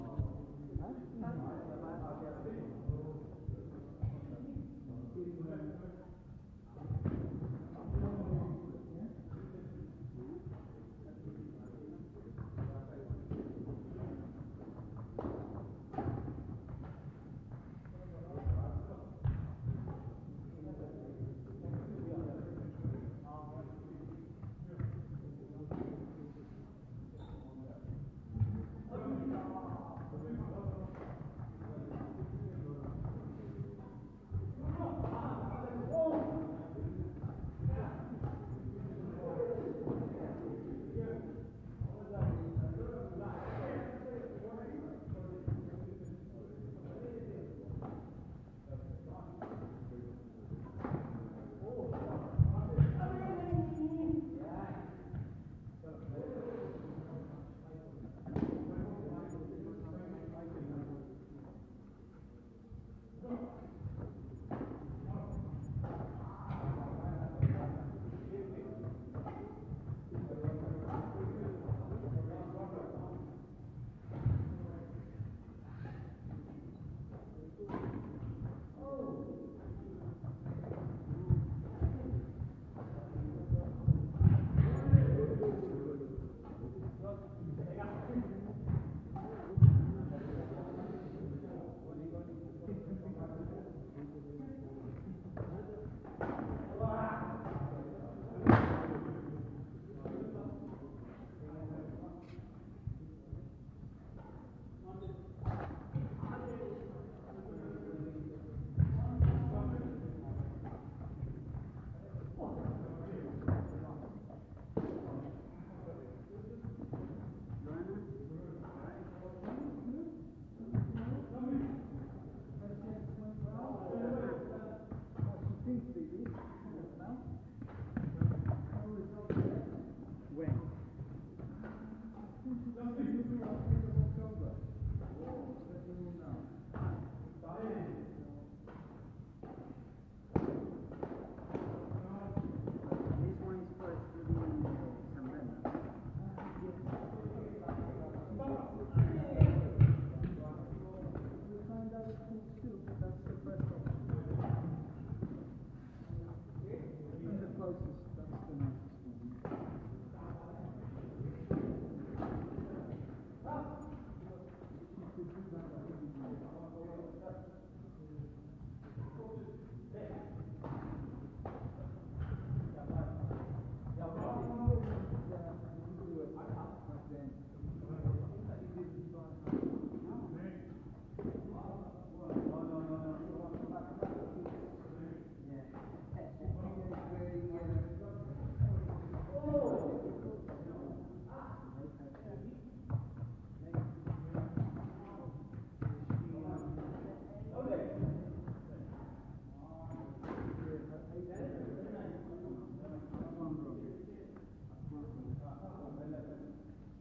People playing badmington indoors in the local sports centre.
badmington, game, gym, shouting, sport, sports-centre